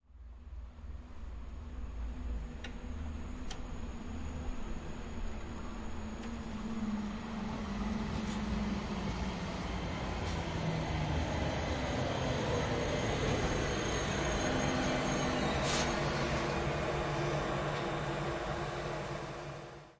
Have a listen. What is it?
Sound of a train which is stopping in station in Switzerland. Sound recorded with a ZOOM H4N Pro and a Rycote Mini Wind Screen.
Son d’un train s’arrêtant dans une gare en Suisse. Son enregistré avec un ZOOM H4N Pro et une bonnette Rycote Mini Wind Screen.
trains, railway, train, way, electric, rail-way, chemin-de-fer, locomotive, rail, rail-road, electrical, vibrations, electric-train, passenger-train, express, clatter